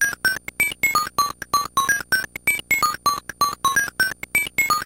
Nano Loop - Square 6
I was playing around with the good ol gameboy.... SOmethinG to do on the lovely metro system here in SEA ttle_ Thats where I LoVe.....and Live..!
gameboy, videogame, chiptunes, nanoloop, 8bit, drumloops